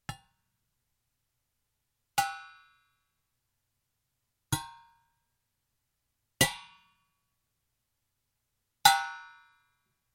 Scissors hitting upside down stainless steel pot recorded from inside with laptop and USB microphone in the kitchen. Make percussive hits from it.
percussion, dangerous, kitchen, sounds, foley